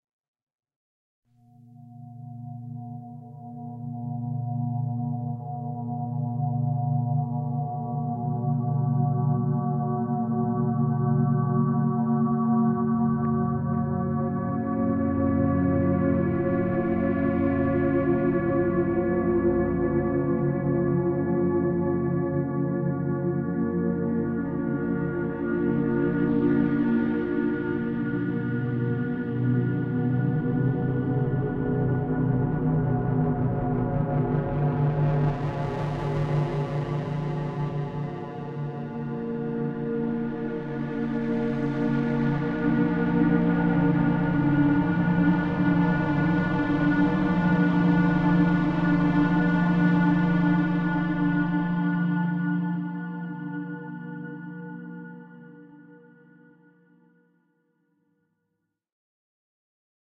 O SPACE PAD 2ec
Strange ethereal sounds, like a sci-fi background. A combination of synth sounds. Part of my Atmospheres and Soundscapes 2 pack which consists of sounds designed for use in music projects or as backgrounds intros and soundscapes for film and games.
electronic, atmosphere, noise, processed, ambience, synth, electro, dark, cinematic, sci-fi